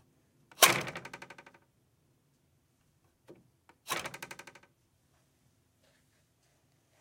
FX Sproing 01
Wood snapping back into position; a kind of cartoonish SPROING!